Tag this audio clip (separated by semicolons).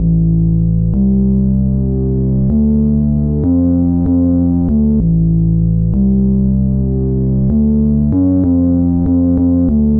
bass; electronic; synth; music; fruityloops; sample; loop